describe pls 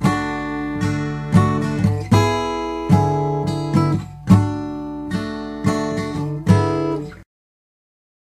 acoustic d fingerstrumdown
Strumming with fingers around a D chord pattern on a Yamaha acoustic guitar recorded with Olympus DS-40/Sony mic.
d, finger, acoustic, guitar, strumming, chord